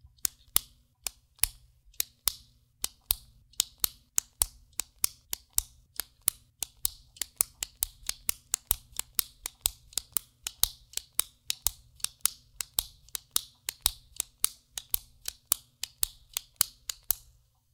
Clicking a Pen
Mus152, Pen